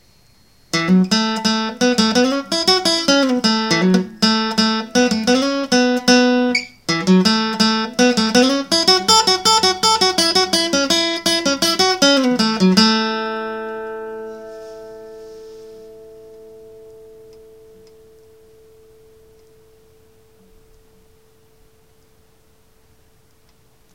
Test sound for "Audio Signal Processing for Music Applications" class.
Guitar: Guild AD-3
Recording device: Galaxy Nexus 2 (I9250) internal microphone.
Recording date: November 23, 2014